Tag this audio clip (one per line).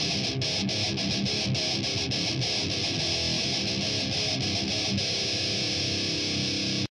groove
rock
metal
guitar
heavy
thrash